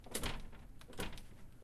door rattle 02
A sound of someone trying to open a door. This is a really quiet sound.
Recorded with Zoom H4n and edited with Audacity.
This was recorded in a classroom.